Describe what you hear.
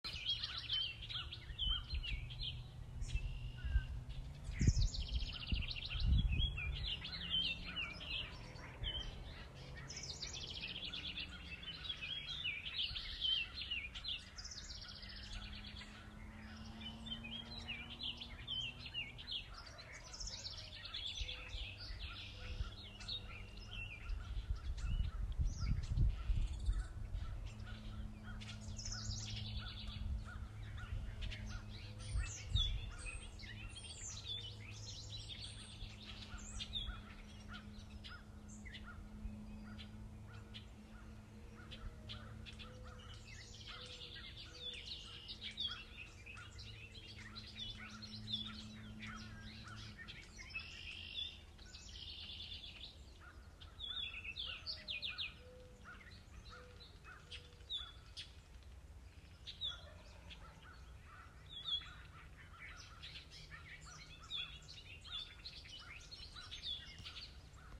(Raw) Birds
A raw recording of birds in a rural forest in Maryland. No background noise has been filtered out.
raw, nature, field-recording